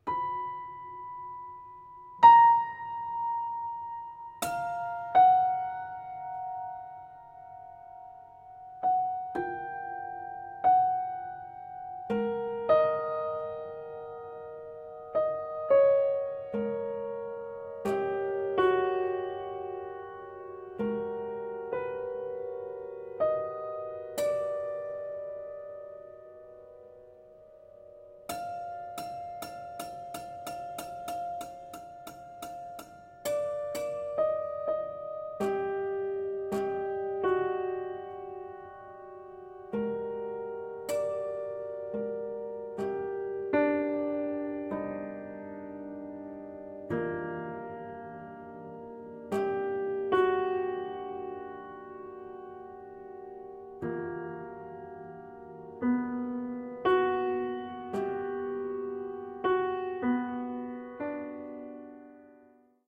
prepared piano impro 1
Excerpt from an improvisation with a prepared piano, recorded with an Audio-Technica AT2020.
prepared, ambiance, improvisation, piano